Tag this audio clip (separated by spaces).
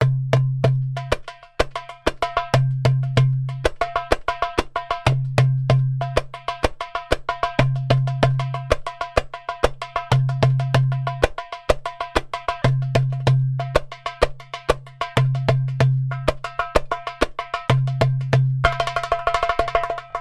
rhythms hand-percussion drums